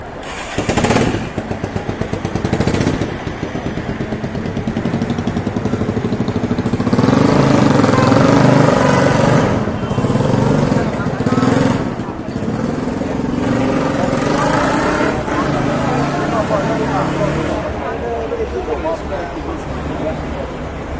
noise from a motorcycle, nice to my ears on this occasion (for some reason I don't know). Shure WL183 into Fel preamp, Edirol R09 recorder